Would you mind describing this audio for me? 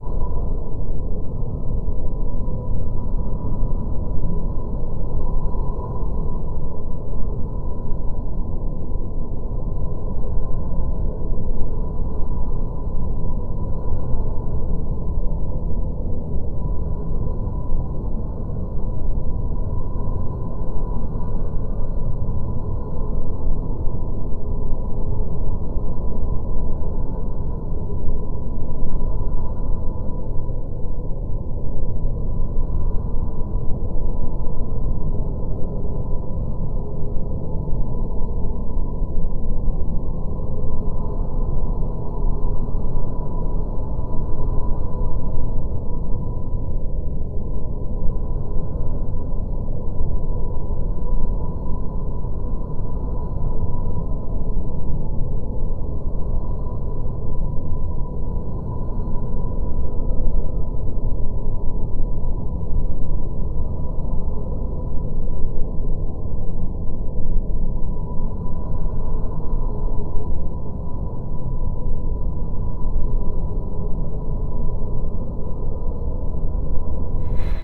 Atmospheric sound for any horror movie or soundtrack.
Evil, Scary, Freaky, Atmosphere, Terror, Halloween, Horror